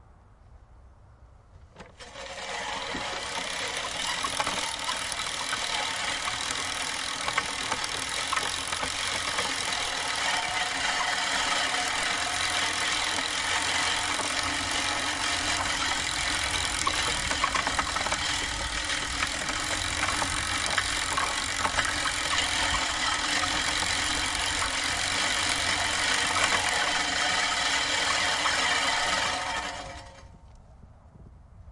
Mowing lawn w/ push reel mower
Mowing my lawn with my Fiskars Stay Sharp push reel mower. No engine noise to worry about, just blades on the reel turning and cutting grass.
cut, cutter, gas, grass, human, lawn, lawnmower, mower, mowing, no, push, reel